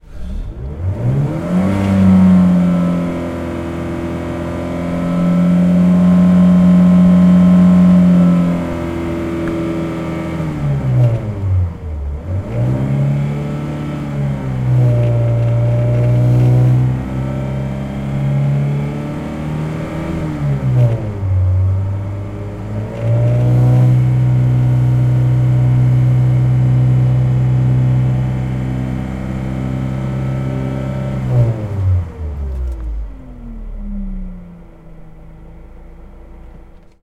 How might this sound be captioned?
Mic placed inside the car Mazda 121